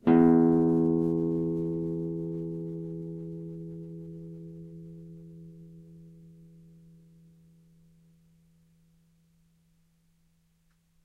E, on a nylon strung guitar. belongs to samplepack "Notes on nylon guitar".
e, guitar, music, note, nylon, string, strings